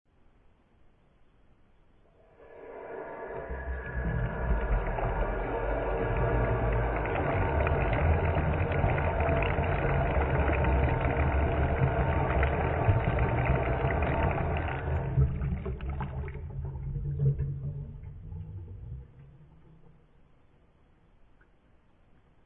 Mono recording of water falling from an opened tap into the sink. Pitched down.
pitched, sink, slow, strange, water